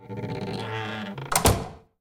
Door Close Long Squeak 02
Door closing with a creaking squeak
creak, lock, wood, squeak, screen